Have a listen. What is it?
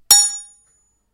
SFX for a fight game- light hit variety